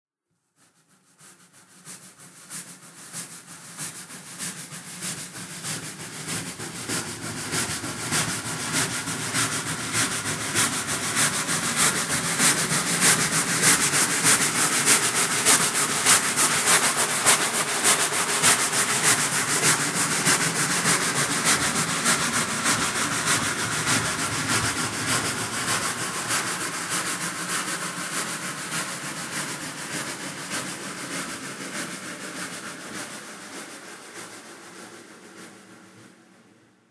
Steam train sound effect
Spoiler alert - From the description, you might be led to believe that this is the sound of an old steam engine slowly chugging its way past you, under a bridge or through an old station. In fact, it is actually the sound of me scrubbing a textured shower-room floor. Nothing more, nothing less.
engine, foley, historic, iron, locomotive, passenger-train, passing, rail, rail-road, railroad, rail-way, soundeffect, steam, steam-locomotive, steam-train, train, trains